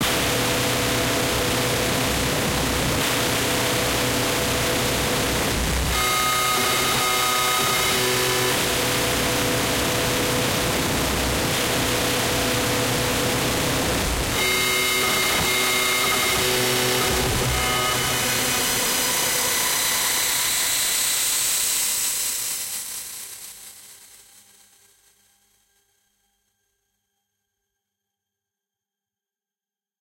Church bells into Dada Life Sausage Fattener about 15 times, into AudioDamage Eos, into CamelCrusher. Harsh noise sounds transitioning into a nice crescendo and flutter towards the end